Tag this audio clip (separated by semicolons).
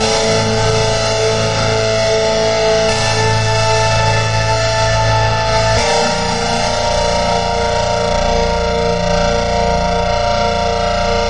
chords; distorted; distortion; drop-d; electric; guitar; heavy; ibanez; improvised; lax; loop; metal; music; power; rock; slow; song; sustain; synth; tense; underground; version; xzibit